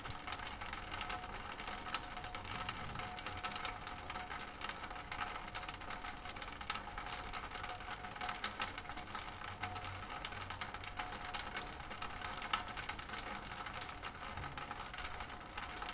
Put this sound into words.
gutter dropping
sound of gutter in the old building during rain, recorded with H4zoom
rain, gutter, dropping